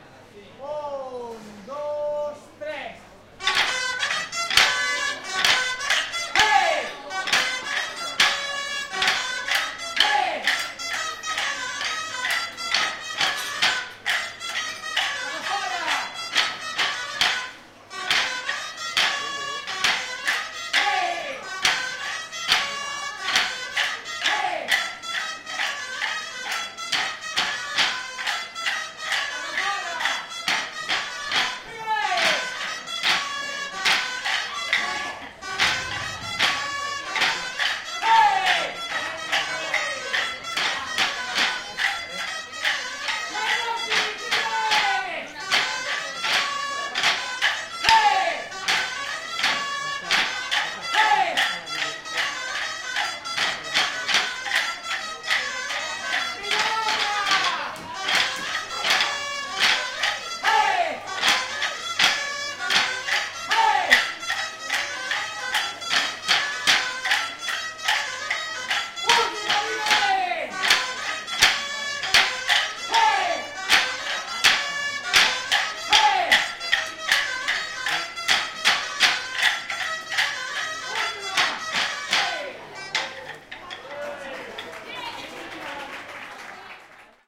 Bastoners UAB - Mercat St Andreu
Group of young bastoners from Barcelona university performing traditional stick-dance with the accompaniment of two grallas inside the market of Sant Andreu during the main festivities of the district. November 2013. Zoom H2.